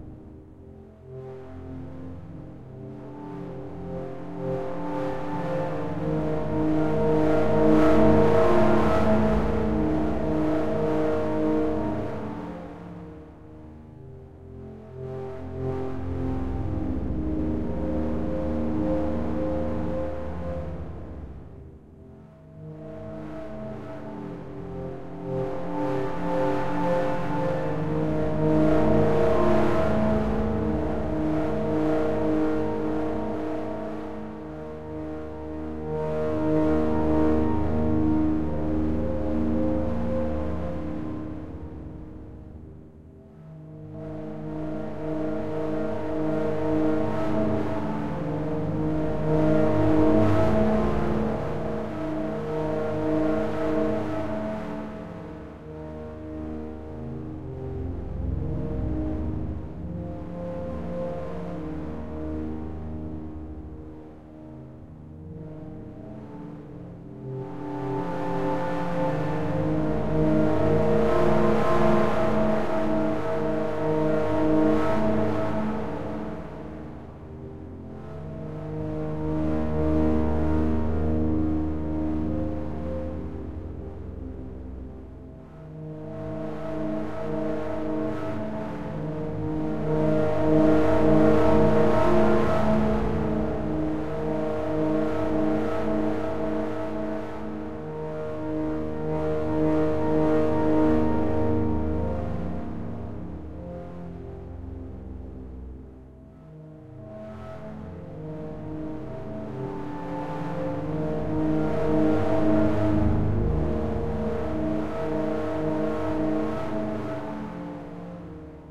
A kind of calling as the voice returns realizing it is ones own.